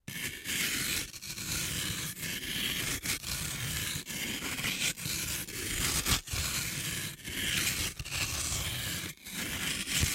Stone scratching over rock (close up), back and forth, H6
Two sandstones scratching on each other.
Recorded in a german region named "sächsische Schweiz" on a
I used the XYH-6 mic.
Arenite, Sandstone, scraping, scratches, Nature, grinding